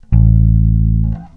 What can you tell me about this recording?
Bass Guitar A1 [RAW]
Some random notes.
Recorded into Cubase using a 4-string Squier Jazz Bass and a Behringer ULTRAGAIN DIGITAL ADA8200 converter.
Cut in ocenaudio.
It's always nice to hear what projects you use these sounds for.
raw, 4-string, sample, fingered, jazz-bass, electric-bass, bass-guitar, note, e-bass, oneshot